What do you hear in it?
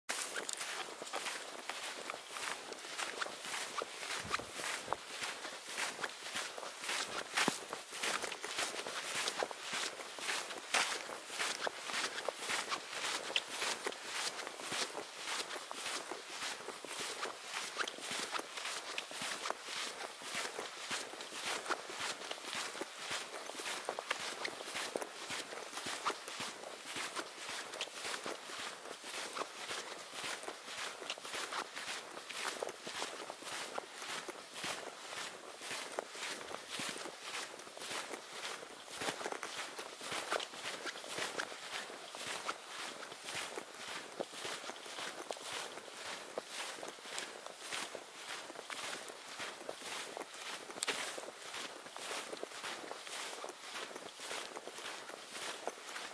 Brisk walk on trail
Recorded on Sony NEX6 camera. I am walking on a sandy trail in LaPine State Park in Oregon. Sorry about the squeaky pants!
Crunch, Footsteps, Hiking, Sand, Walking